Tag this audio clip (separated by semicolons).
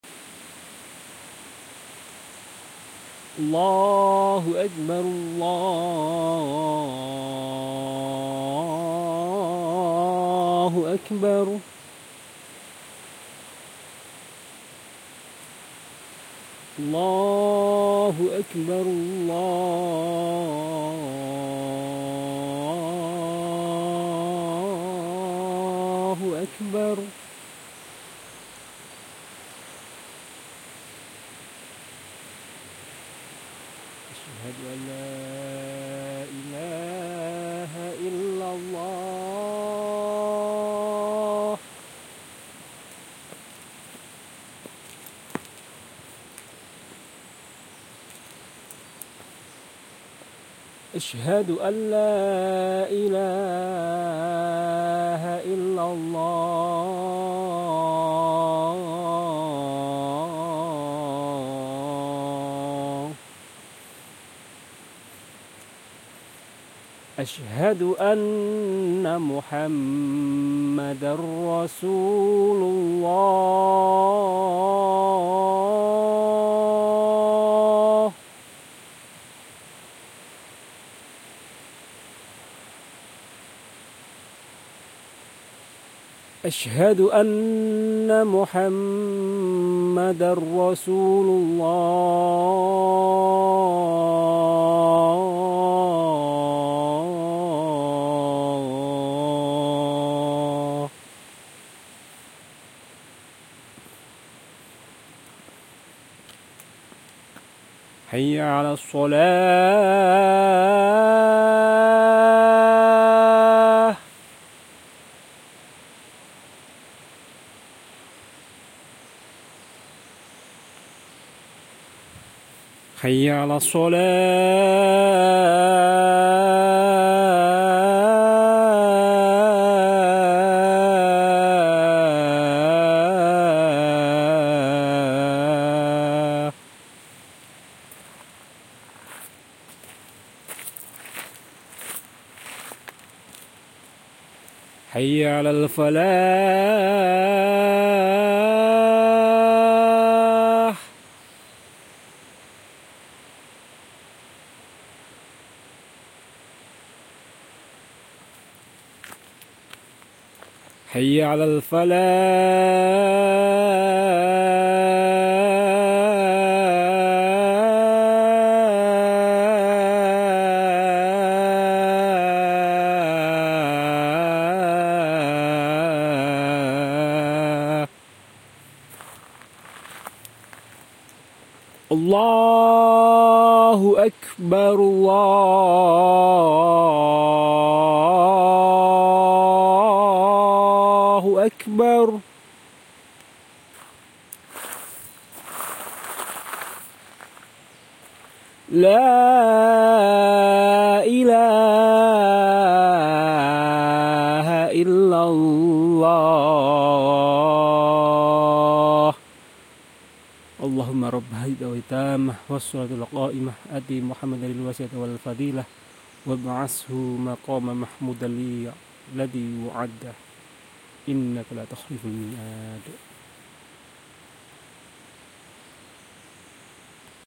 footstep; forest; adhan